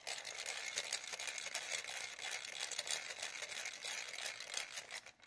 pencil sharper
mechanical pencil sharpener
gears, pencil, wood, mechanical